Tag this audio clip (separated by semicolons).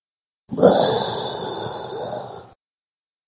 freaky; growl; not-nice; odd; angry; weird; bad; horror; animal; monster; evil; mean; macabre; scary-animal; dark; dismal; scary; awesome; feral